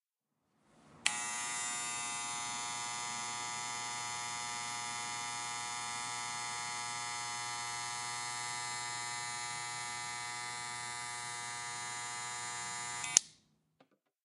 Barbershop t-edgers.
barber, barber-shop, barbershop, clipper-cut, clippercut, clippers, edger, hair, hair-cut, haircut, haircutting, tedger, t-edgers